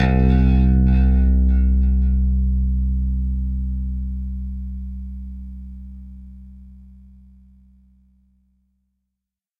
Picked BassNote C
Picked_BassNote_C
Bass Guitar | Programming | Composition
Funk, Bass-Sample, Soul, Funky-Bass-Loop, Groove, Synth, Synth-Loop, Hip-Hop, Synth-Bass, Bass-Loop, Bass-Recording, Bass, Fender-PBass, Beat, Bass-Samples, Ableton-Bass, Bass-Groove, New-Bass, Ableton-Loop, Funk-Bass, Fender-Jazz-Bass, Compressor, Logic-Loop, Drums